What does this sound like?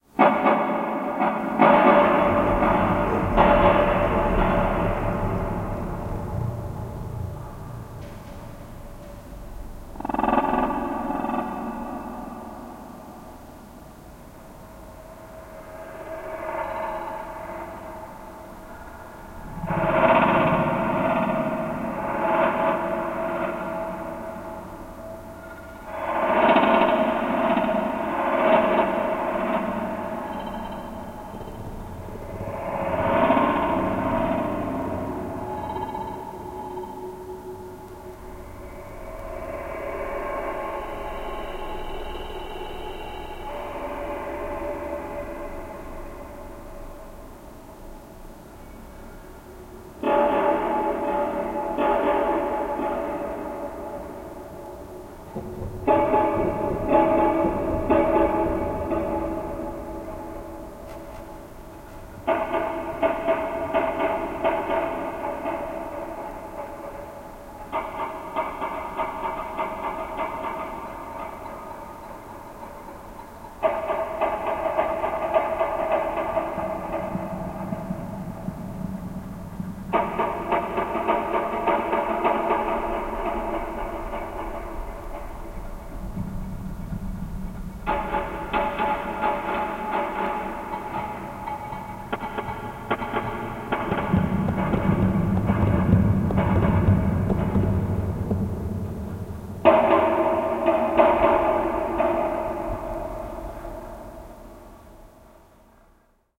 Piledriver Cave
A distant piledriver recorded one morning then processed with delay and reverb.
Ambient, Experimental, Noise